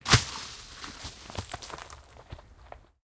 Log Falling in Forest
This is a recording of a log being thrown on the forest ground. This is a binaural recording.
Equipment:
Neumann KU 100 Dummy Head stereo microphone
Zaxcom Maxx